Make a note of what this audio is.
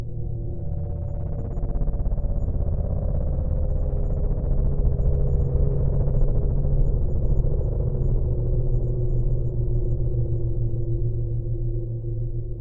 Light ambient drone 2
ambient, minimal, score, soundtrack